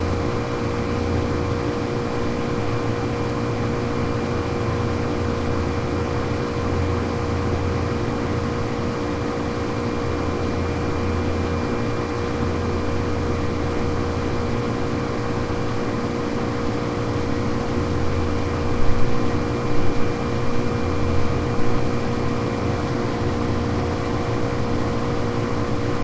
Compressors at cooling plant.